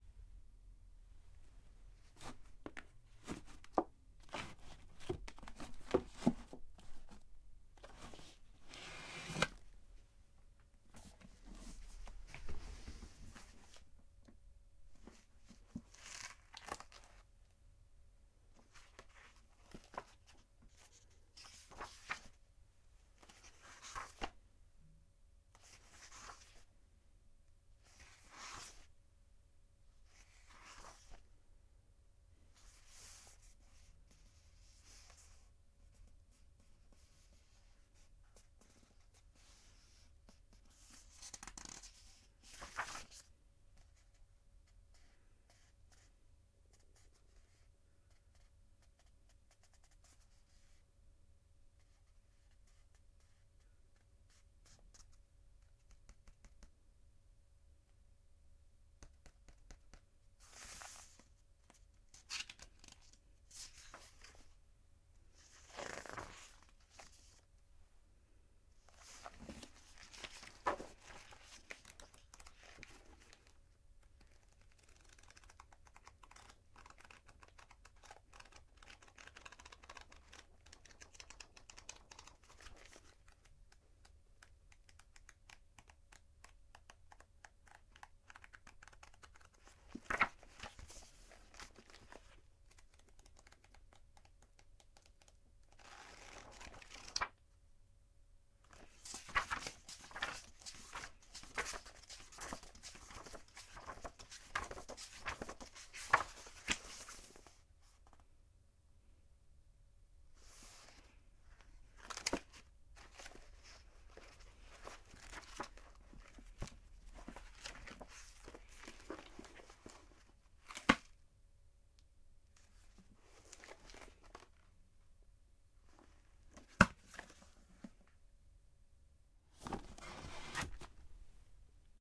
book handling
getting a book out of the library and opening it. flipping through it and turning the pages. reading with the finger on one page. browsing through all pages at once (like a flicker book). searching for a page. closing it and putting it back in the library
archive, book, browse, close, flicker, library, open, pages, read, search, turning